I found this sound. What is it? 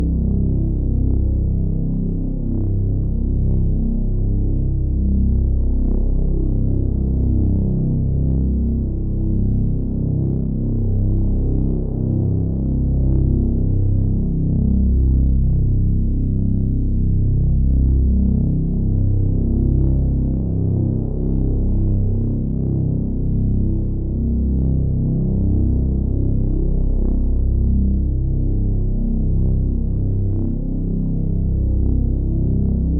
Drone C Simple Tonal [loop]
Needs to be faded in, but otherwise it should properly loop.
Created using Cubase and Retrologue 2.
A simple patch exploring the VSTi's Multi oscillators.
Two oscillators plus a bit of semi-random filter modulation.